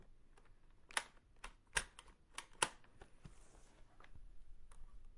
opening laptop and putting it on
Me opening laptop and then pressing the power button, recorded with Zoom h1n.
open, power, Laptop